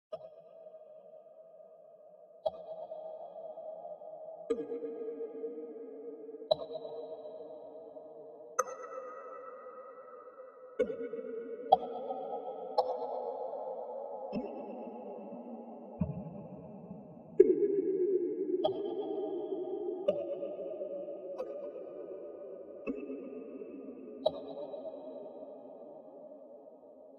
Synthetic Cave Drips
Sounds like being in a cave on some other planet. It could be used in a single platform game or something? You be the judge! Stay creative!